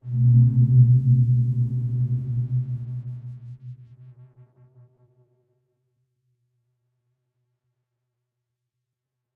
giant dog II
The original source of this sound is a record of a dog bark, transposed and heavily processed. A bit of crossover distortion gave it a "synthetic feeling". The sound was recorded with a Tascam DR100 and the processing was done with Audacity.
bark, bass, synth, processed